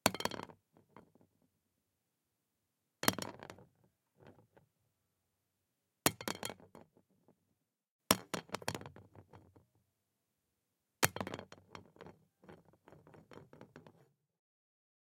A hollow wooden bamboo stick falling on a plastic hood.